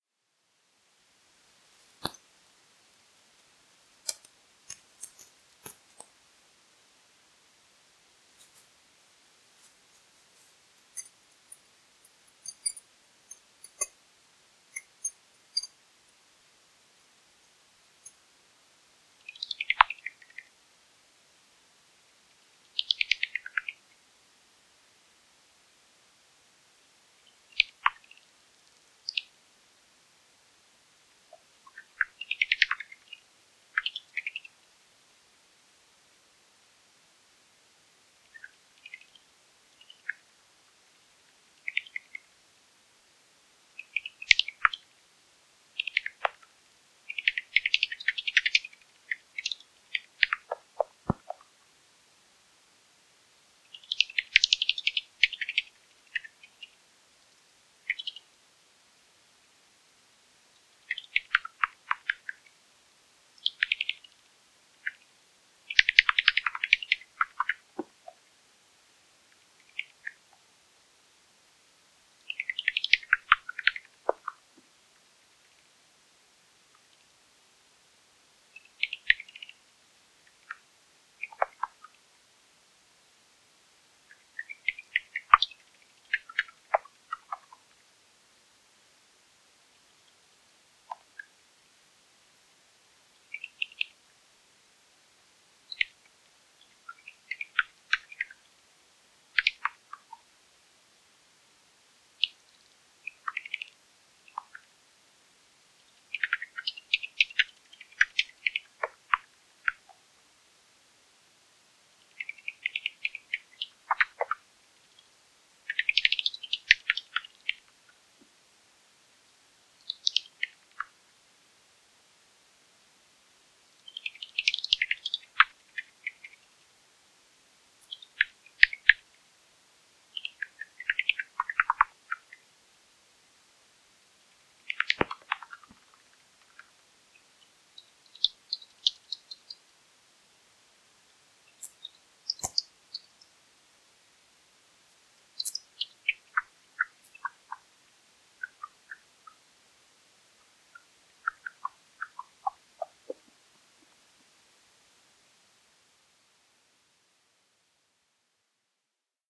Bats in East Finchley 9 July 2017
Microphone: Magenta Bat5
Recorder: Olympus LS10
Processing: Audacity
Location: East Finchley
Bat species: Unknown
Bats, nature, Wildlife